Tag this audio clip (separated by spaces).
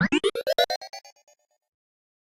game gameaudio indiegame sfx Soundeffects